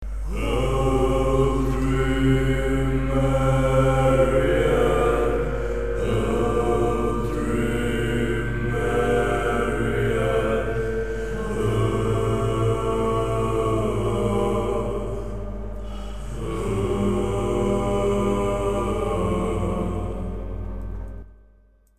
DREAMERION - choir
Choir recorded by Jakub E.
choir, chorale, dreamerion, ghost